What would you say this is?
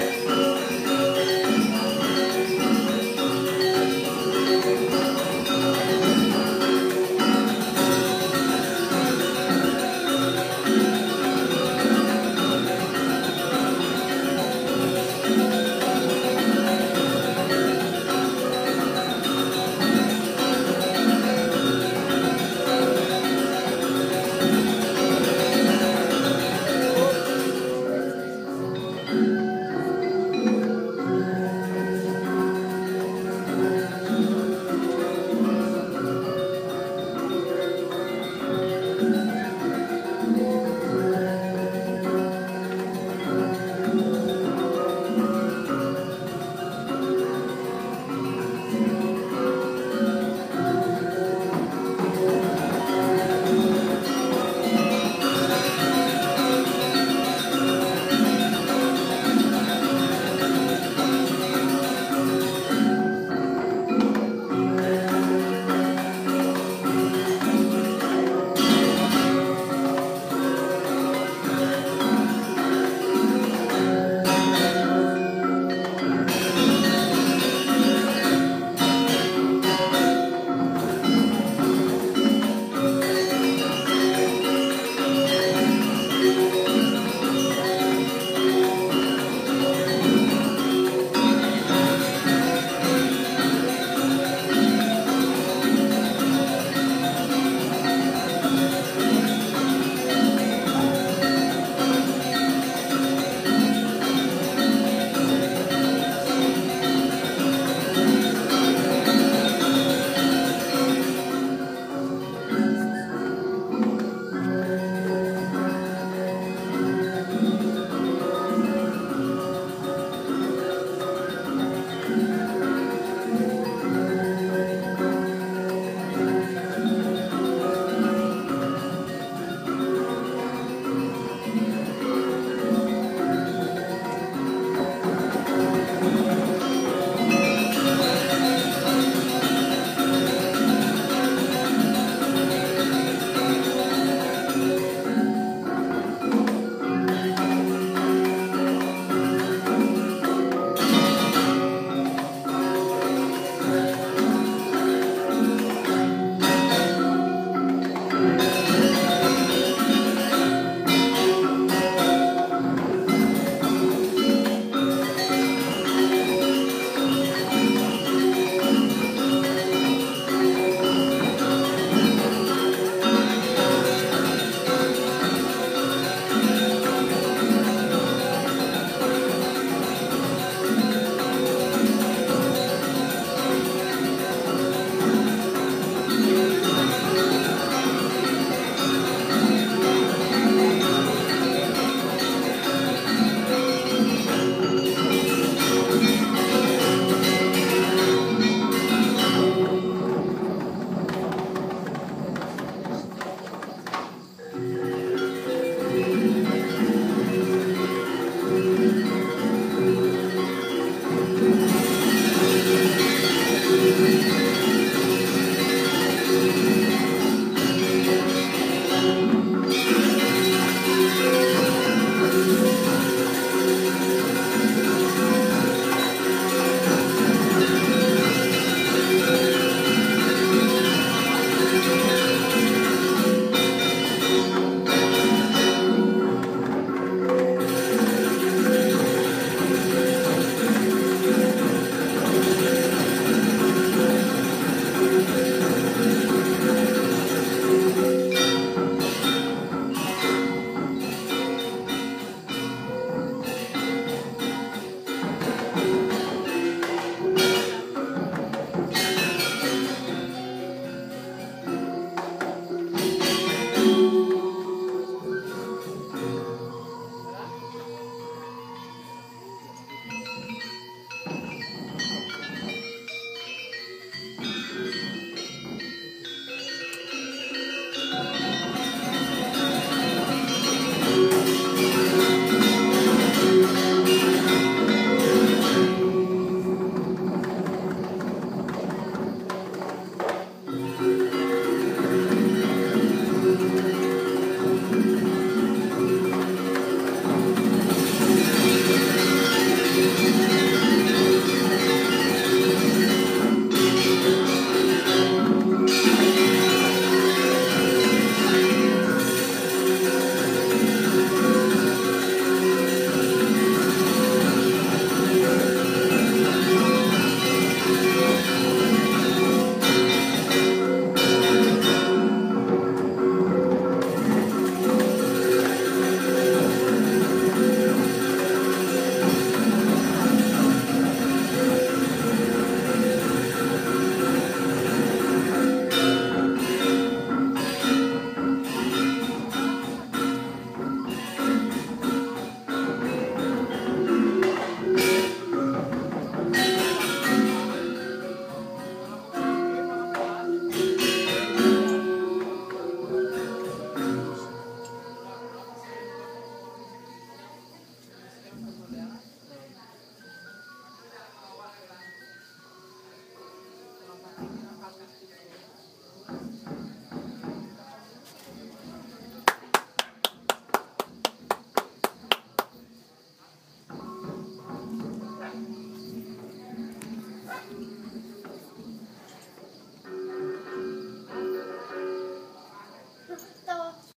Bali Gamelan Orchestra rehearsal
recording of the rehearsal of the local gamelan orchestra of a small mountain village in bali. this was not a concert for tourists, more like a rehearsal party. they played, laughed and had fun all night long. recorded with my smartphone, so the quality isn´t too good...
bali, gamelan, rehearsal